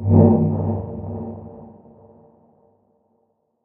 dark movement
dark, hit, fx